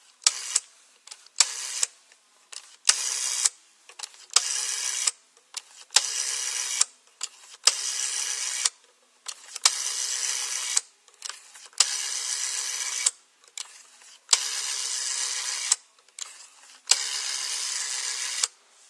In an antique store in LaConner, Washington I saw a old black desk rotary dial telephone. I dialed the numbers 1 through 0 and recorded them on my cell phone. It's a fairly clear recording and different from the other rotary dial sounds here. I rolled off the low end a bit to get rid of some ambient noise in the store.